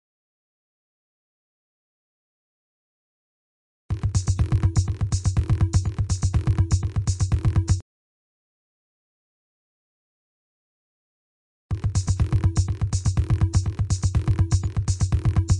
Fast Bass Pulse
Lots of bass at 123 BPM.